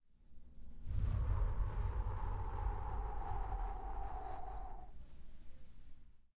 Viento, ventisca, soplar
soplar, ventisca, Viento